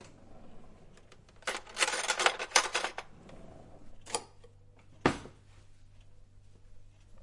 Vyndavání příborů a pokládání do misky s cornflaky.
cornflakes, cutlery, fork, jar, knive, spoon